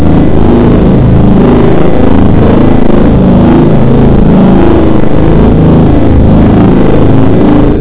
1stPack=NG#8
Weird lo-freq. sounds.